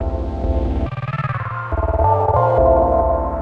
Synth Loop 28 - (140 BPM)
Cinematic, Drone, Drums, Looping, Pad, Piano